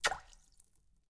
Variations about sounds of water.
drop, liquid, SFX, splash, water